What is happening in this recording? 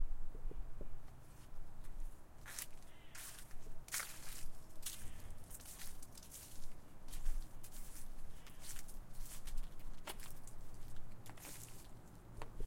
leaves crunching
Walking through leaves that crunch
Leaves, crunching